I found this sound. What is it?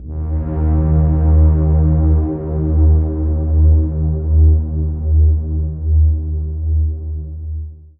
SteamPipe 7 DarkPad C3
This sample is part of the "SteamPipe Multisample 7 DarkPad" sample
pack. It is a multisample to import into your favourite samples. A
beautiful dark ambient pad sound, suitable for ambient music. In the
sample pack there are 16 samples evenly spread across 5 octaves (C1
till C6). The note in the sample name (C, E or G#) does not indicate
the pitch of the sound but the key on my keyboard. he sound was created
with the SteamPipe V3 ensemble from the user library of Reaktor. After that normalising and fades were applied within Cubase SX & Wavelab.
ambient, pad, multisample, reaktor